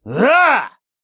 Swing grunt
The sound of a warrior or soldier grunting to put effort into a powerful sword or axe swing. Something like that.
garble, gargle, growl, viking